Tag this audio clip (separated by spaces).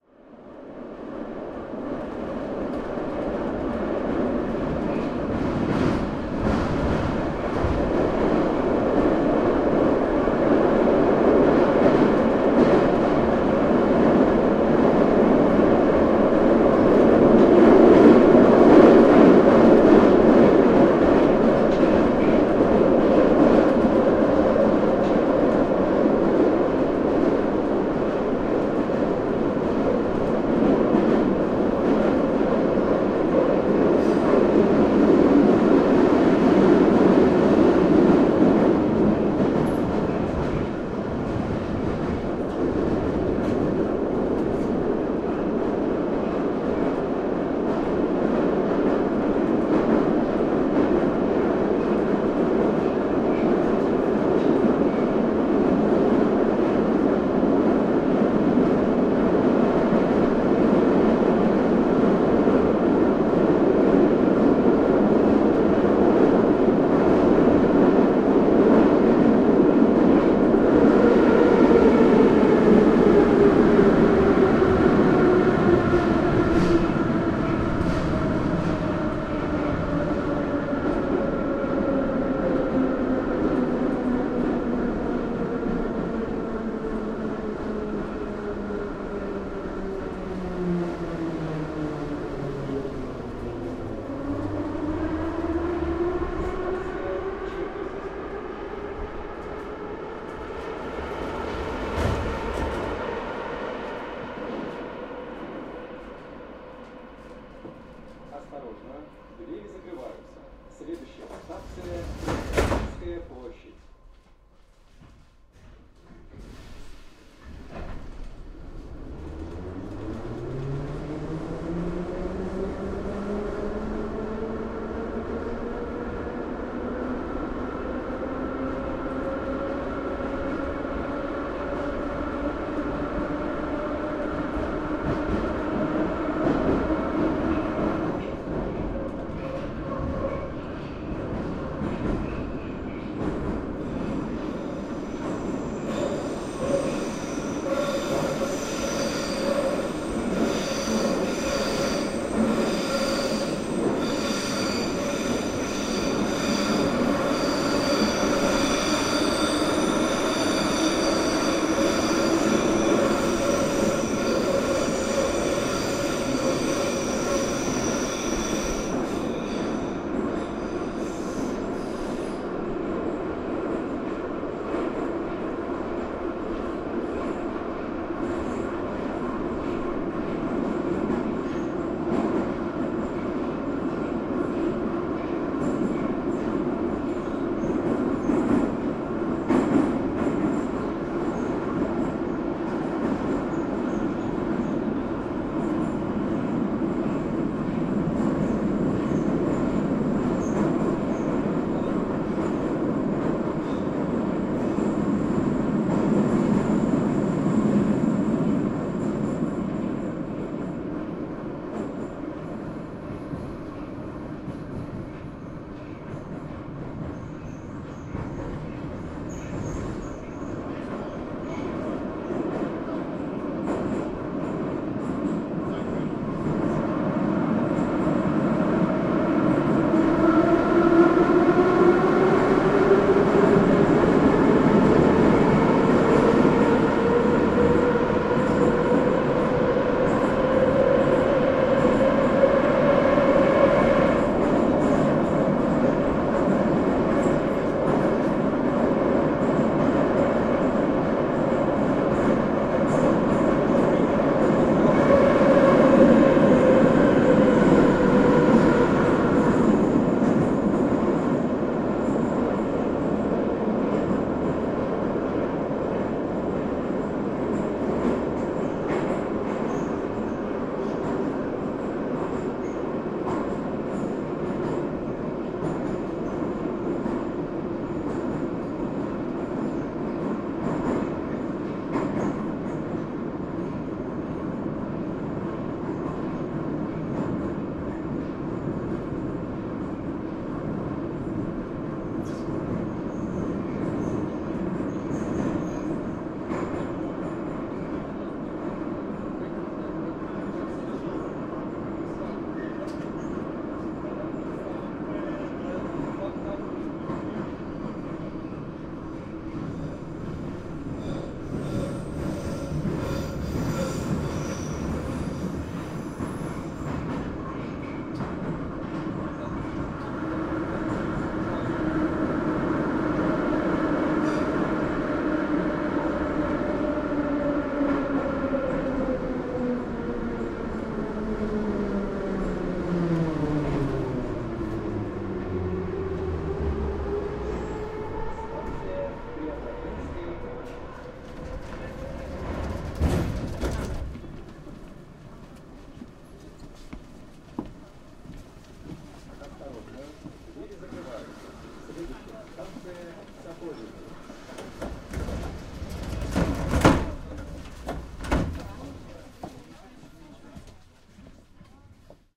Locomotive Metro Moscow Subway train Transport Transportation Travel underground